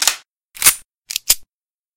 I made my reload sound with blackjack and sounds.